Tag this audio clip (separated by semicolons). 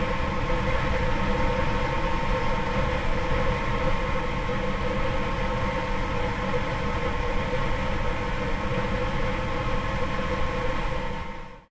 machine; indu; ambient; hum; industrial; drone; noise; background